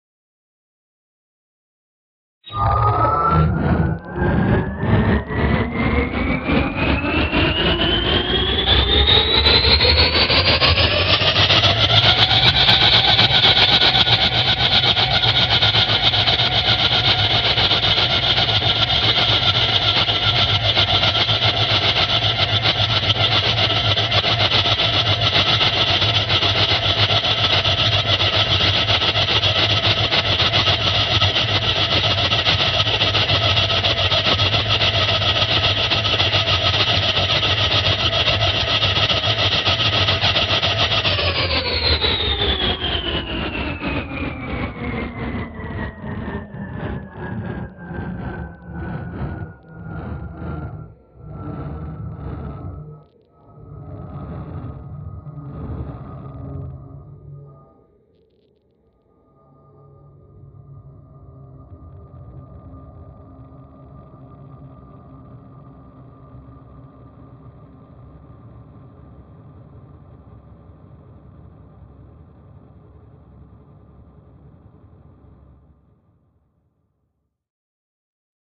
An engine sound from a reaktor synth I built which can produce many different types of engine and mechanical sounds, using the granular synthesis of reaktor 3.
Engine revs up, runs for a bit, then cycles down
engine, machine, motor, reaktor, rev